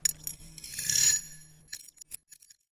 Using the indent(carved patters) on the glass cup, I swiped the glass, further manipulating the sound outcome.